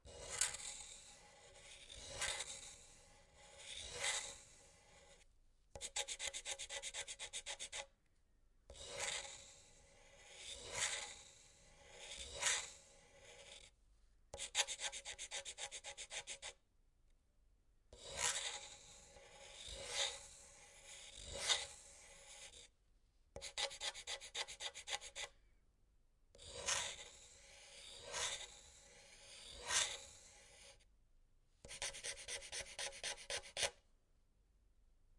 piirrustus terävä
Drawing or doodling randomly on a paper with a sharp pen
drawing, pencil, scribbling, sharp